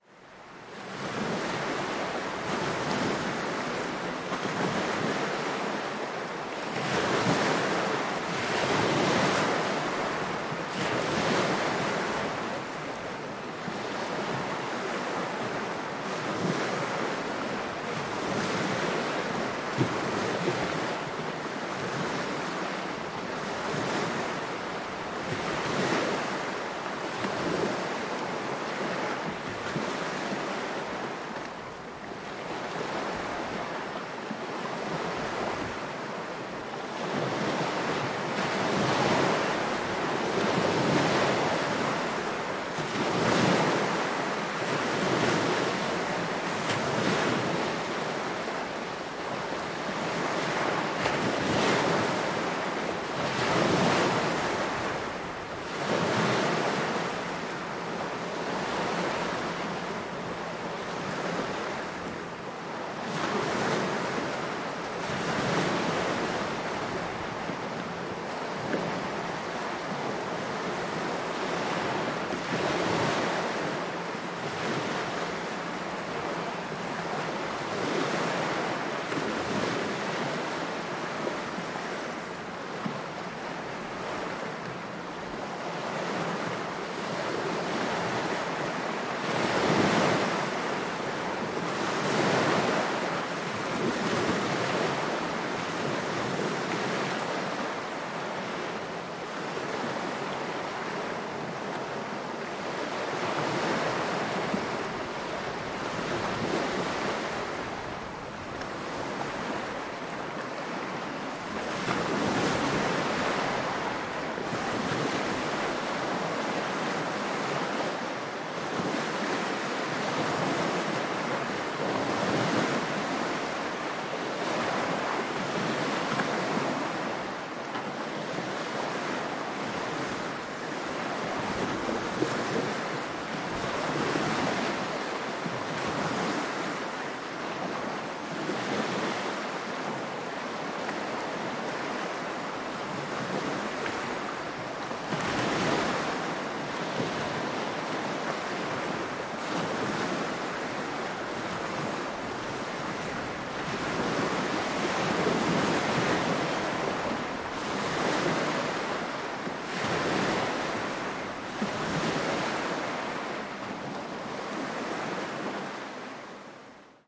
Waves on shore from pier of lake maggiore
Waves break on a small beach at lake maggiore.
Recorded in Ticino (Tessin), Switzerland.